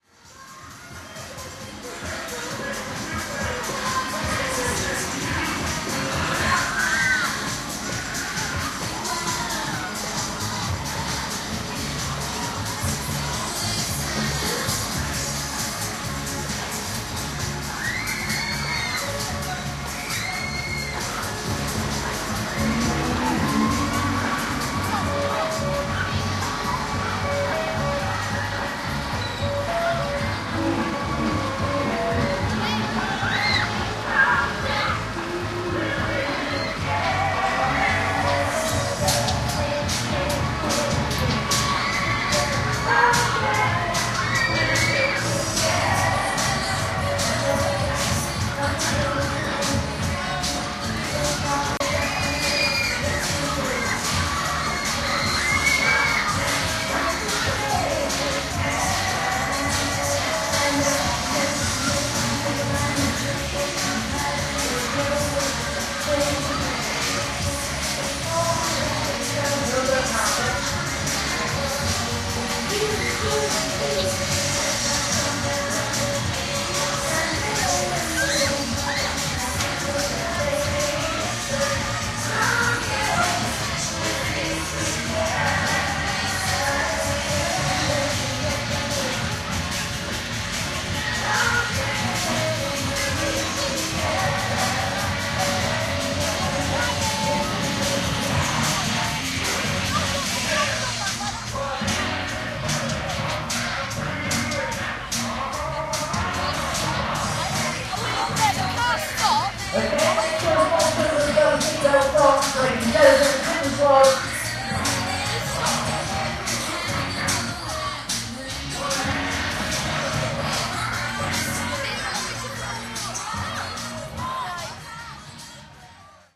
fairground ambience 1
Recorded at the East Yorkshire village of Eastrington at their 100th summer fayre on 20th June 2009. Held in the village field, there were a few hundred people attending. Around the perimeter were stalls, to one end were the farmers displaying animals and machinery and a horse jumping competition. At the other end was the fairground, a brass band and food tents.